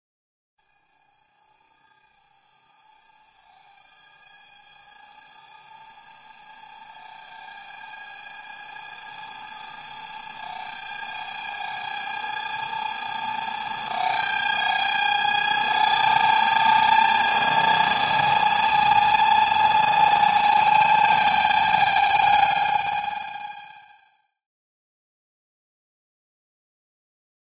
seeing the dead body and realizing that's what it is and you're going to die next
scary build.
Created with Omnisphere 2 in August 2017 using ableton for a suspense film I did Sound Design for.
delusion, imminent, imaginair, slow, thrill, dramatic, cinematic, terror, build, sinister, terrifying, movie, bogey, impending, frightful, fearing, macabre, anxious, film, suspense, threatening